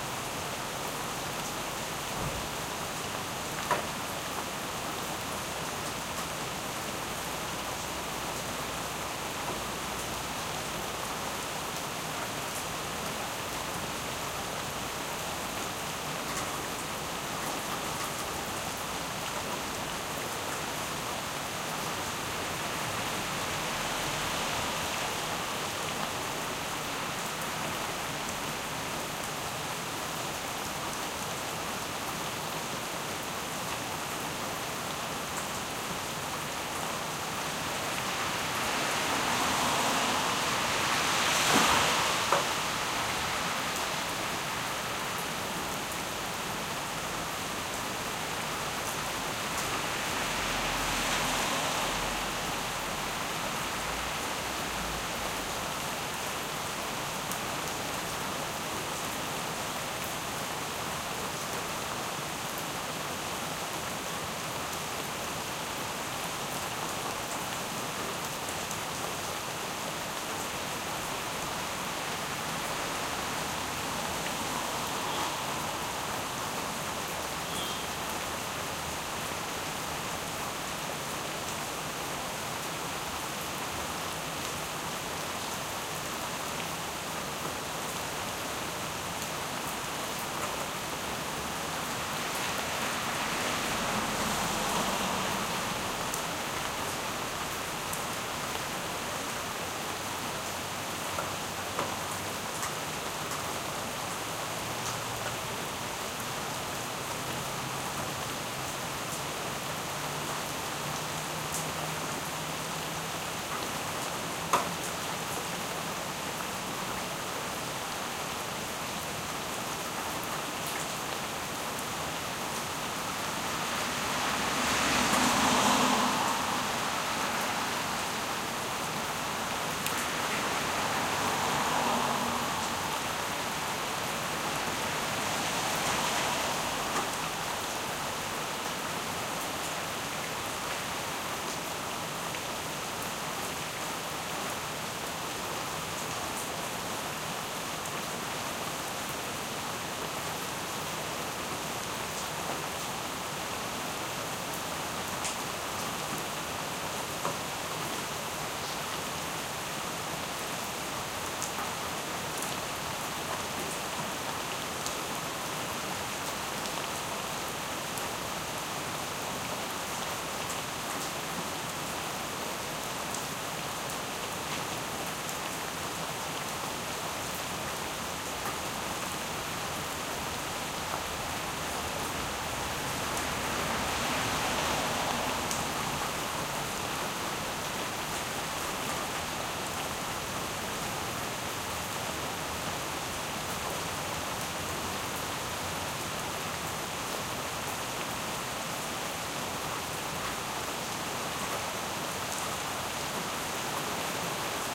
City night rain stereo 2
city, field-recording, nature, rain, storm, weather